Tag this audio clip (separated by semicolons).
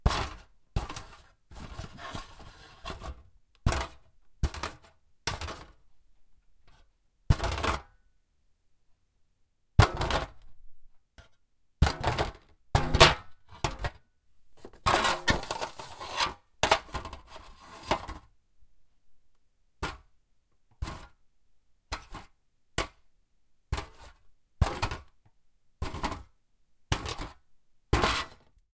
aluminum; clang; clank; drop; hit; impact; metal; metallic; plate